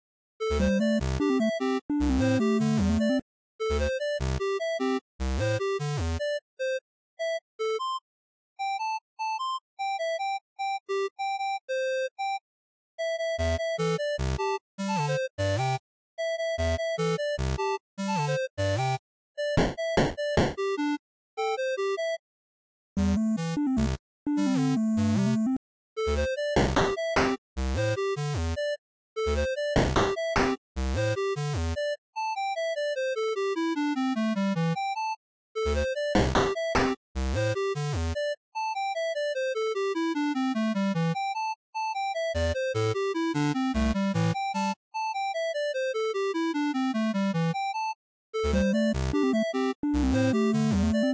This sound is part of a series of Level sounds, sound effects, and more, all 8 bit and 80s theme